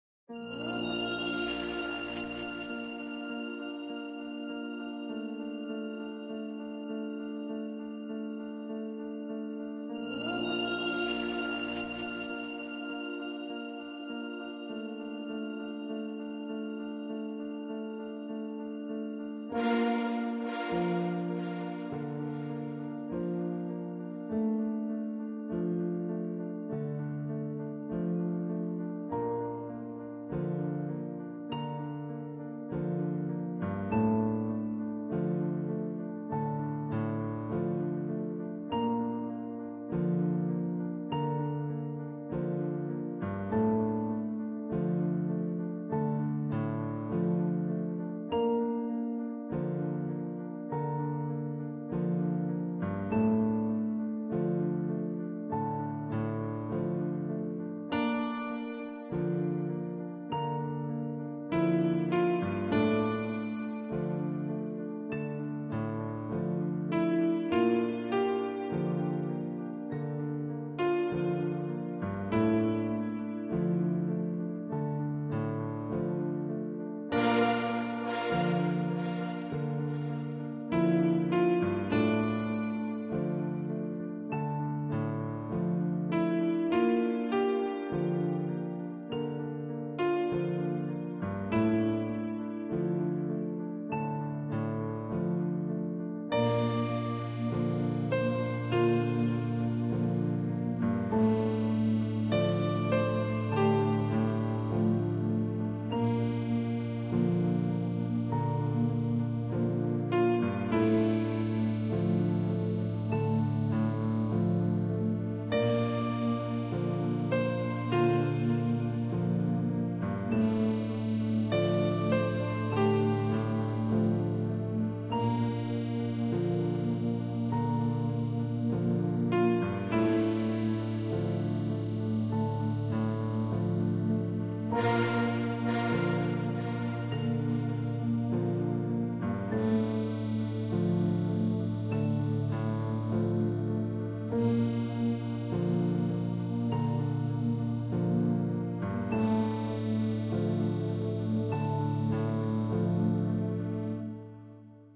Extract of "Sweetness dark" Piano track.
Synths and instruments:Ableton live,Sileth1,Yamaha piano.
track, film, original, atmosphere, deep, cinematic, pads, dark, Sweetness, pad, Piano, fx